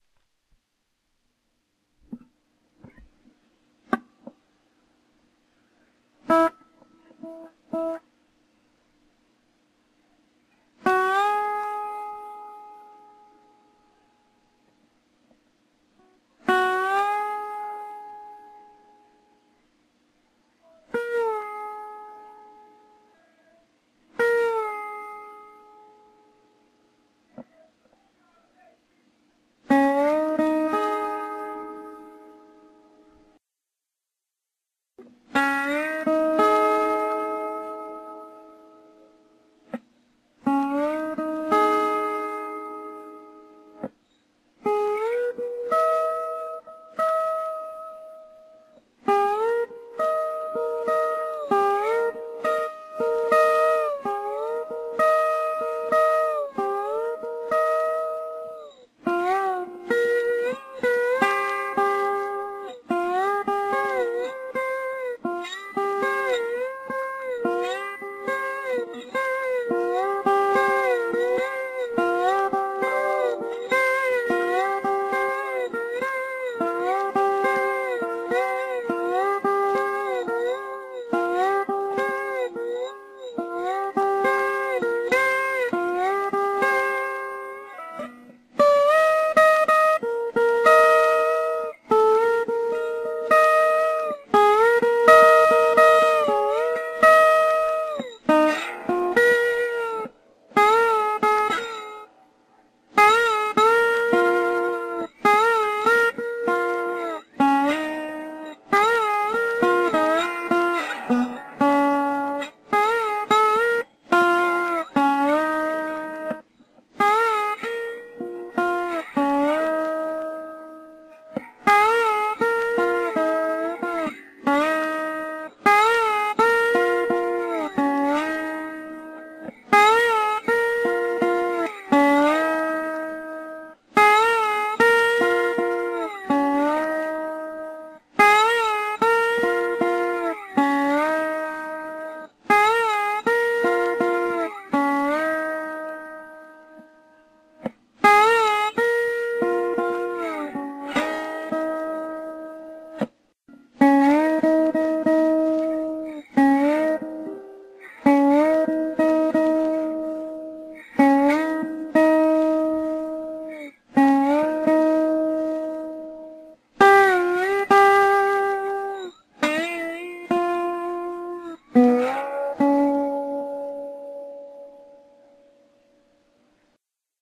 I thought that I would record some slide playing on my acoustic guitar, and then cut the entire thing down to make up some licks and notes that people can use. I have used noise reduction, because there was some noise. This is the entire thing.